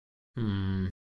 voz de duda hombre